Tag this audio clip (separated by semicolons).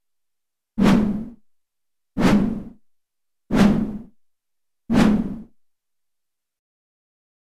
stick; whooshes; Swing; swoosh; whoosh